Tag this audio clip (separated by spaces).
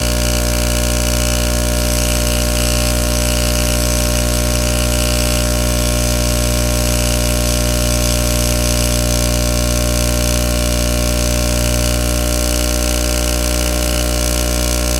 analog basic buzz electric motor organic osc oscillator pwm sampled saw synth synthesizer unique wave